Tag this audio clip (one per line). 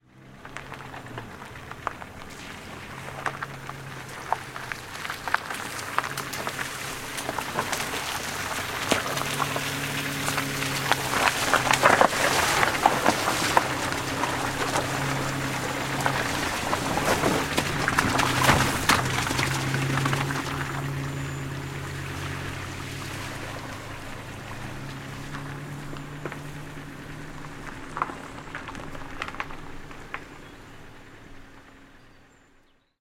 car
passing
puddle
volvo
field-recording
car-pass
mud
engine
gravel
vehicle
drive-by
saloon
splash
outdoors